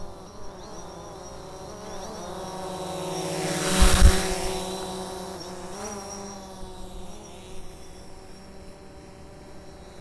UAS Drone Pass 02
Recording of a drone flying by. Recorded with a Tascam DR-40
DJI, drone, field-recording, fly-by, Phantom-4-Pro, UAS